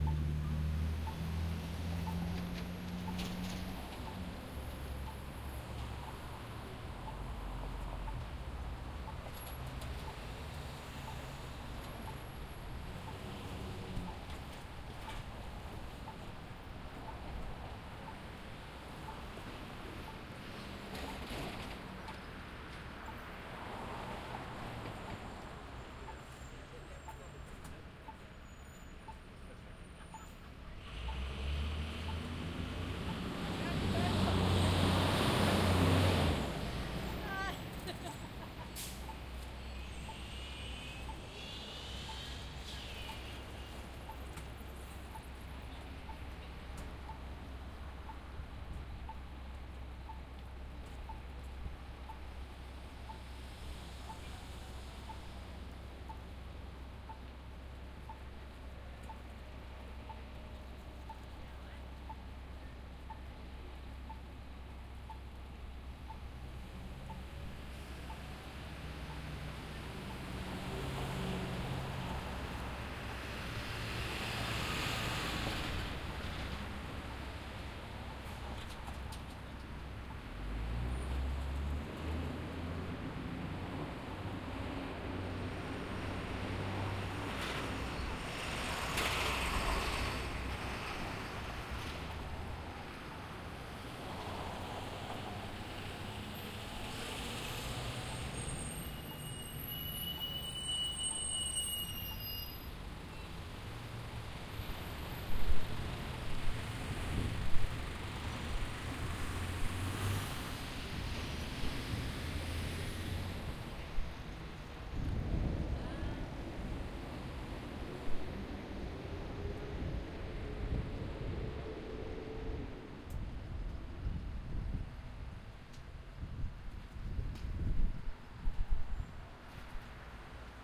Binaural stereo recording, waiting to cross a street in a city.